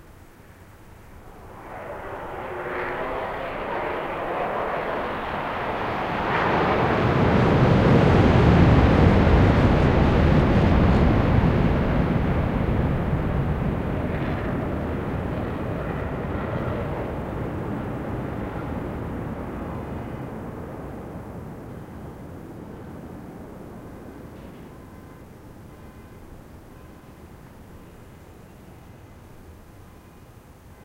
air show flyby 2007-08-18
A jet passes by my window at the Chicago Air Show on August 18, 2007. Recorded through a Rode NT4 into a MacBook line-in running Audacity.